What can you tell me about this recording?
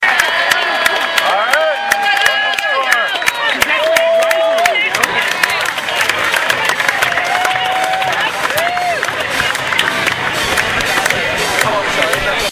cheering claps music at Cyclones game.
claps, music